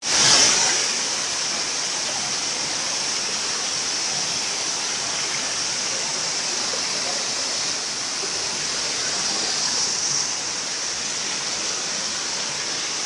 Waterfall sound natural
Waterfall sound of big natural waterfall in Shillong